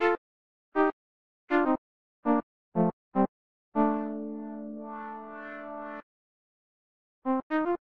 Poly-8 D Phrygian 120
120bpm, D, loop, Phrygian, synth